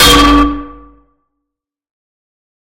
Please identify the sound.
A metallic hit sourced from my bed frame using an XY microphone then time-shifted with some heavy waveshape distortion. I primarily see this sound's application being quite appropriate for melee weapons...say a crowbar? I say this because it's what I used it for.